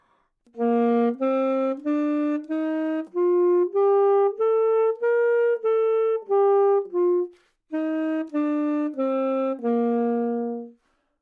Sax Alto - A# Major
Part of the Good-sounds dataset of monophonic instrumental sounds.
instrument::sax_alto
note::A#
good-sounds-id::6853
mode::major
alto, good-sounds, AsharpMajor, sax, neumann-U87, scale